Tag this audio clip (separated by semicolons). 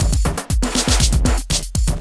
acid; breakbeat; drumloops; drums; electro; electronica; experimental; extreme; glitch; hardcore; idm; processed; rythms; sliced